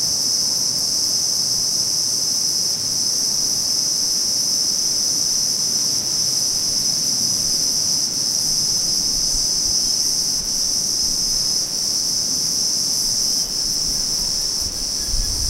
Costa Rica 8 Night Insects
stream, ambiance, waterfall, central-america, summer, insects, costa-rica, ld-recording, nature